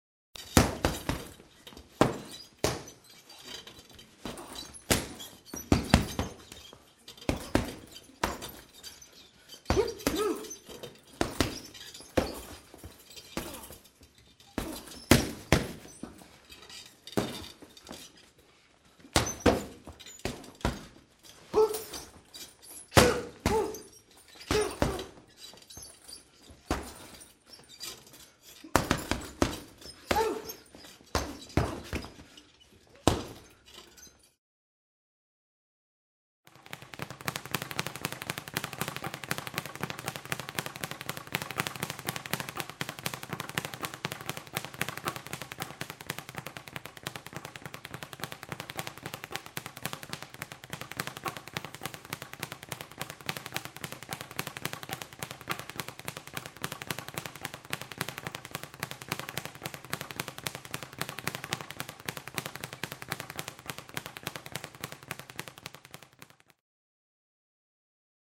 Punches on bag